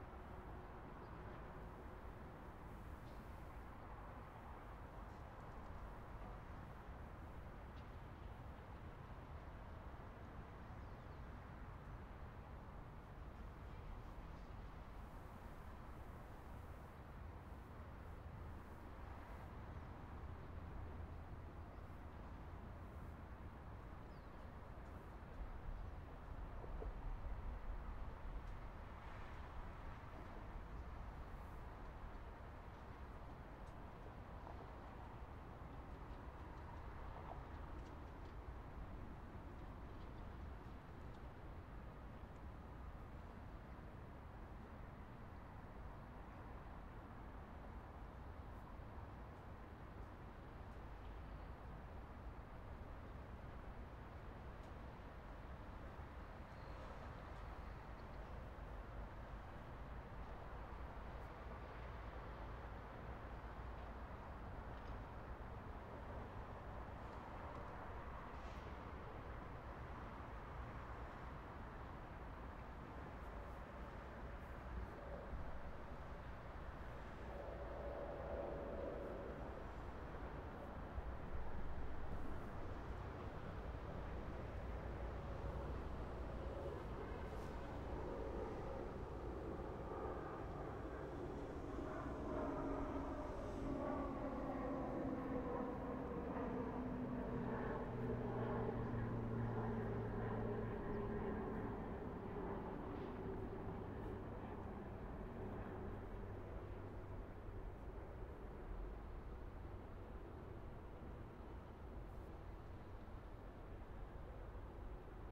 Ambience Los Angeles River Plane
los-angeles, field-recording, ambience